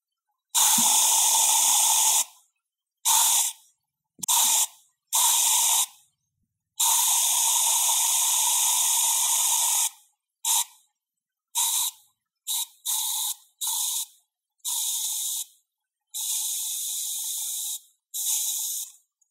A spraying sound I made using an air freshener spray.
Recorded with a CD-R King PC Microphone and processed on Adobe Audition.
aerosol, Air, Gas, Spray